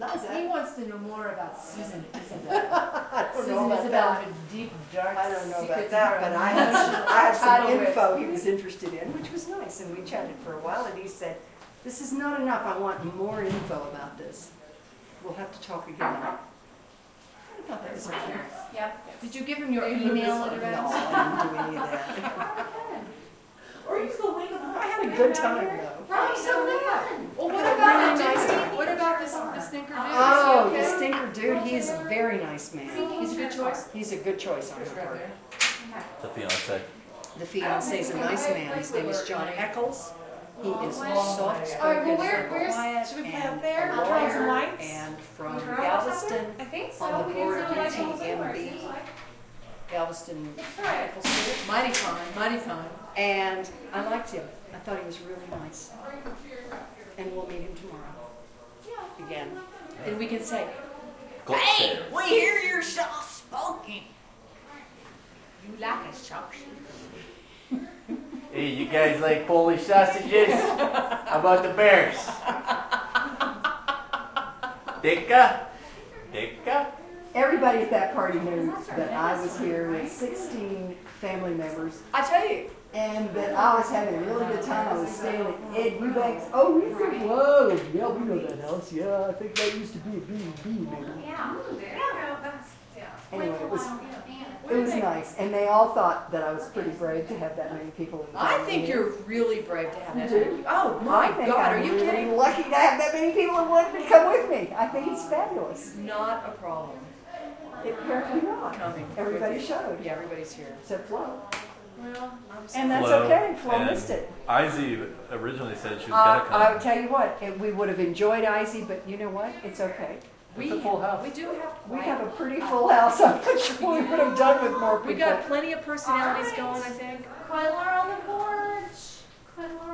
sanmiguel house chatter02
Lots of people talking in a house in San Miguel de Allende, MX
talking, speech, voices